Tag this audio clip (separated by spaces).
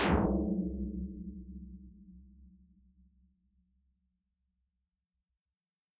image-to-sound drum Reason processed dare-26 tom tom-drum